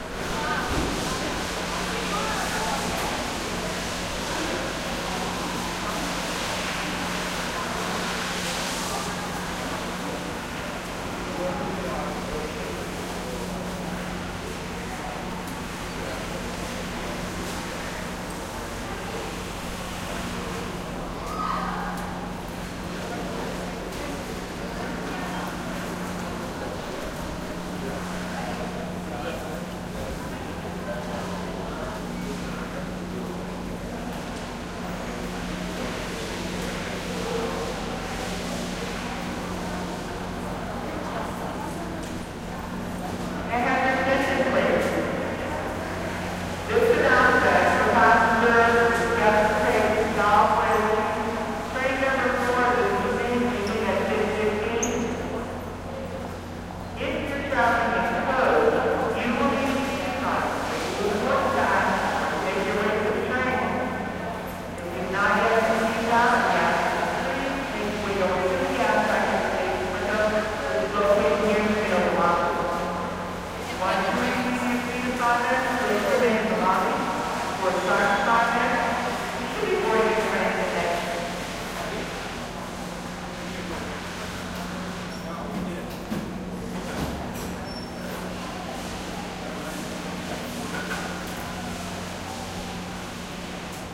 Los Angeles Union Station
Walking through L.A's Union Station, a hub for trains and busses.